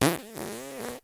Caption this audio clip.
The magical musical fart recorded with a with a Samson USB microphone.